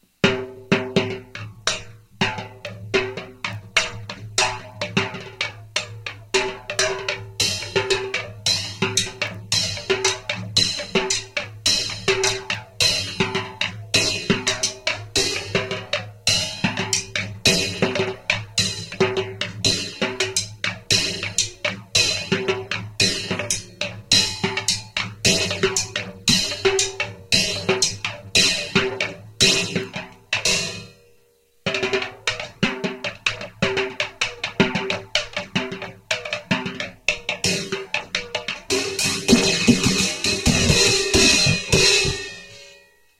So, this file, the two to follow, are just me beating on a snare drum
and some other percussion stuff. The beats are stupid, but they're
really just meant to be sample sources.
diy, drum, homemade, sounds